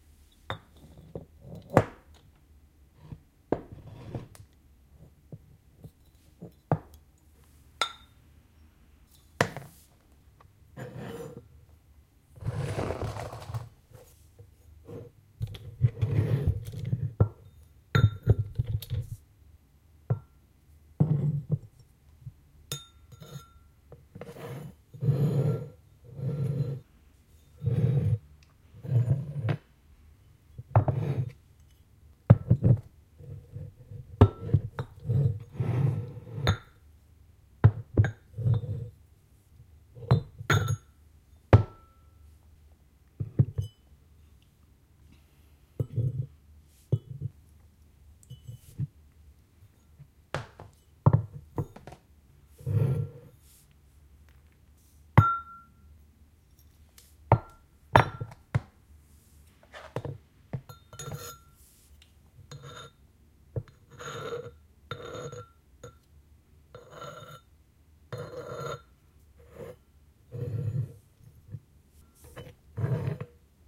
Assorted Ceramic Bottles, Jugs and Bowls clanking against each other, sliding over a wooden surface, being touched or put down, scraping the Ceramic bowl with a metal Spoon and placing the bottle ready to pour into another ceramic glass.